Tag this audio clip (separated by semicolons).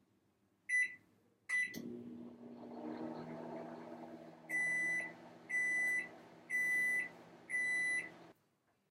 encendido; microondas; microwave; turn